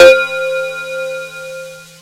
45 bells tone sampled from casio magical light synthesizer